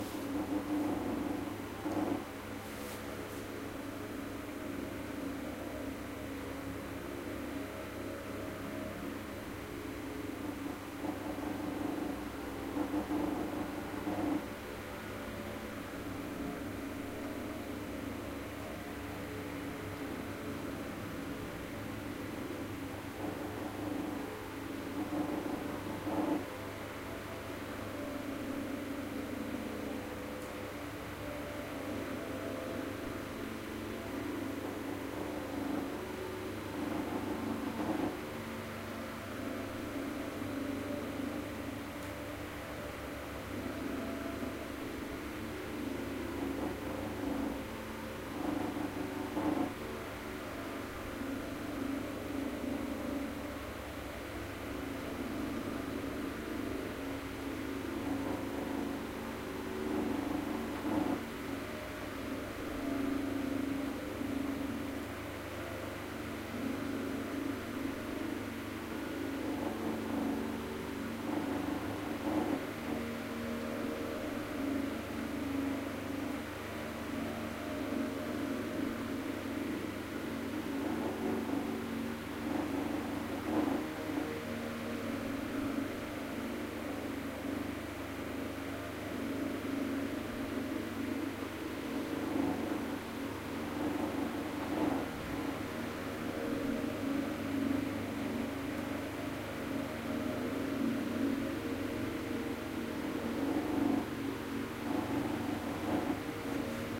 1 minute noise of a cheap table fan. The fan housing rotates and creaks in the process.